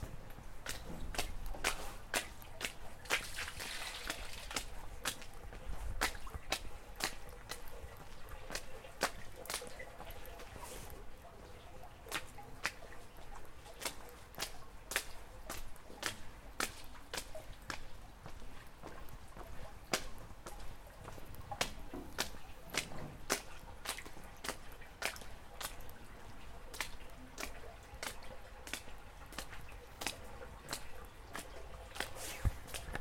Footsteps in Rain
rain, Weather, footsteps, puddle